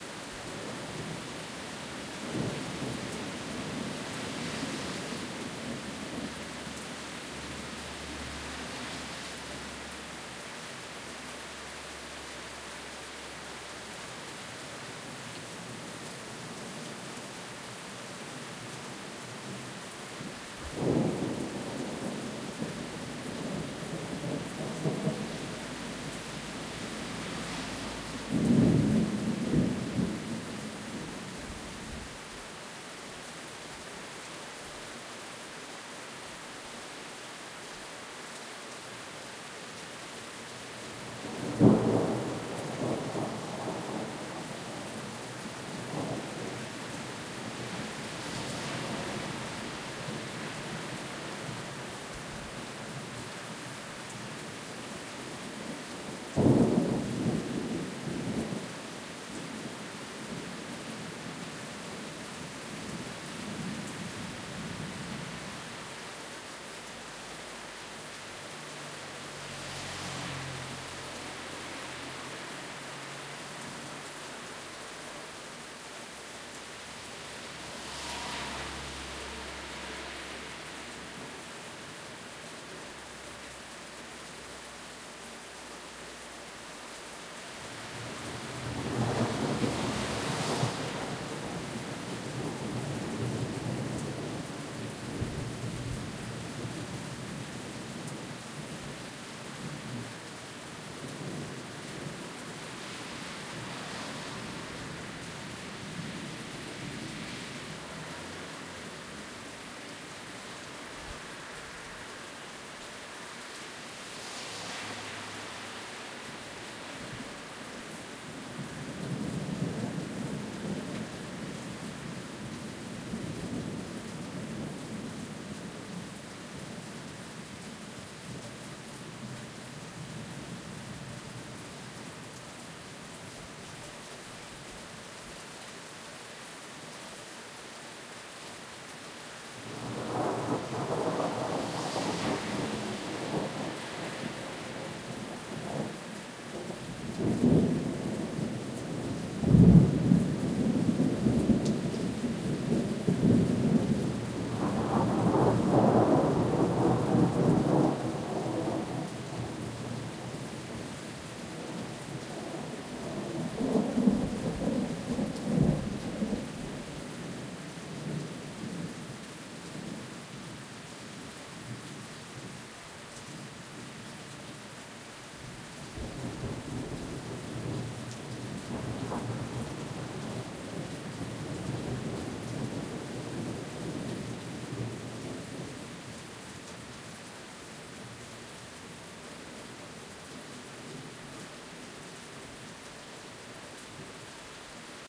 Thunderstorm, heavy rain, city, street, occasional cars passing by
atmos, rain, traffic, city, storm, ambience, thunder, lightning, ambient, street, thunderstorm, weather, field-recording, nature